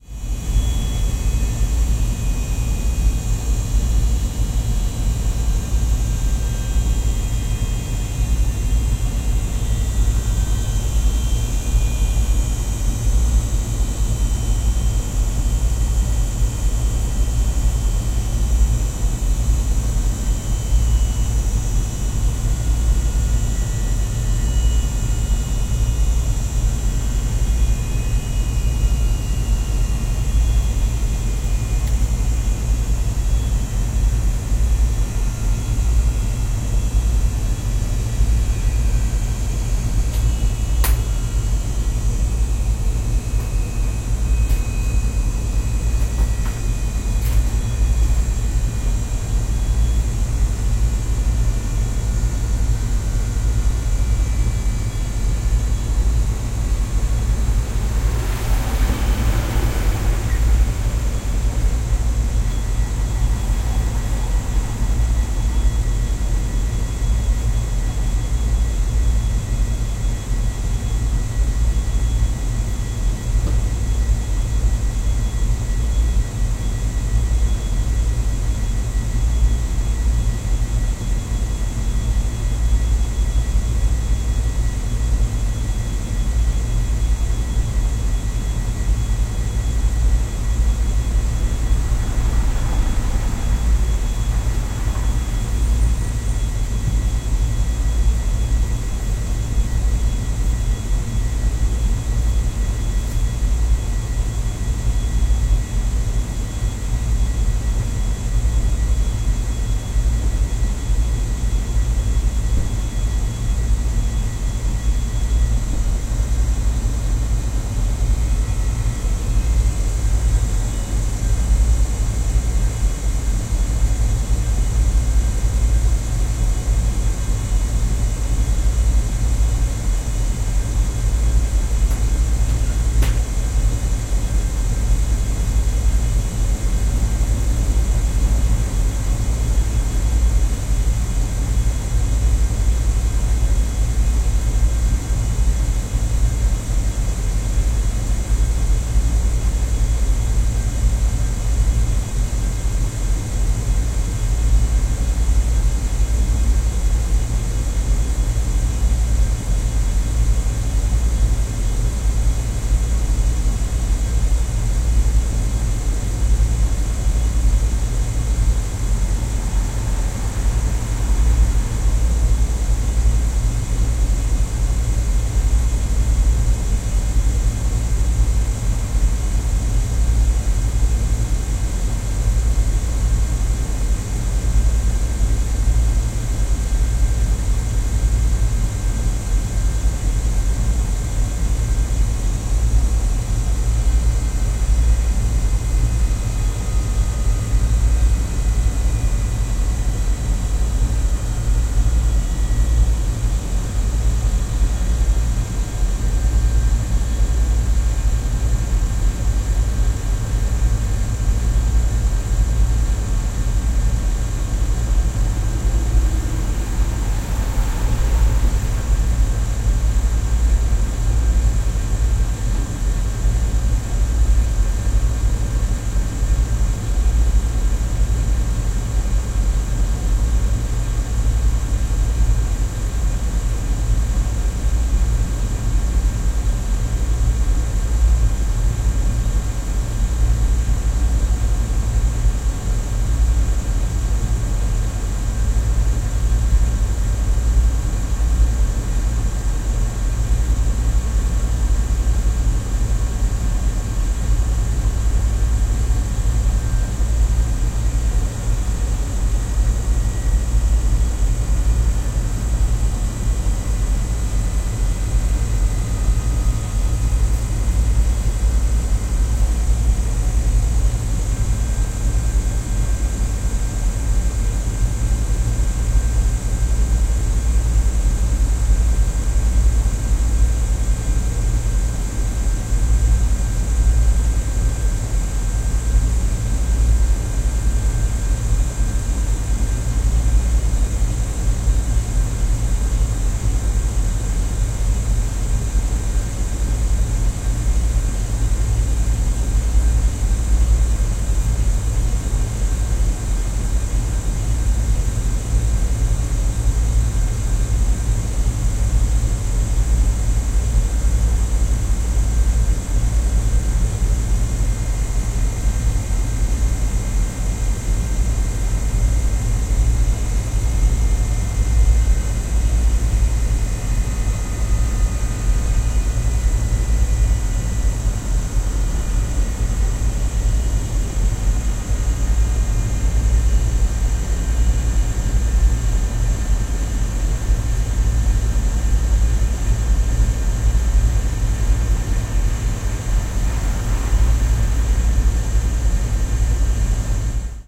Air conditioner device from the street
20120116